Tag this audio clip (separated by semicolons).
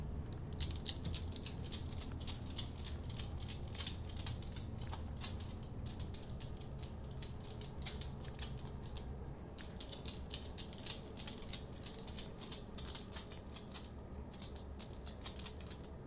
clicks; dog; kitchen; linoleum; poodle